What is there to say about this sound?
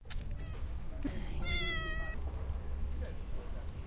A cat meowing at the Box Shop art studio in San Francisco.